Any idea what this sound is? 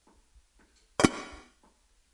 tapa cerrando
cerrar una tapa de porcelana